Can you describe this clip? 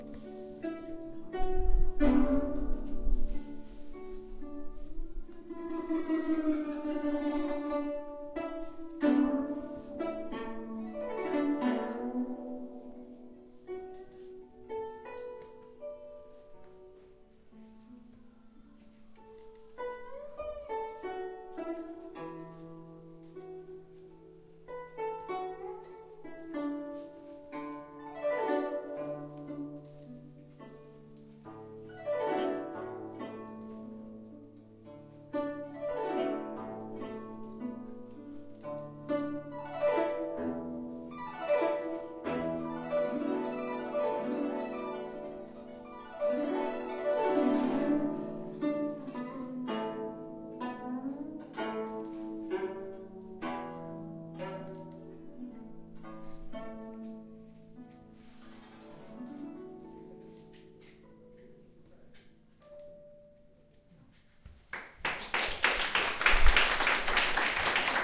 chinese music guzheng02
chinese traditional music recorded in Suzhou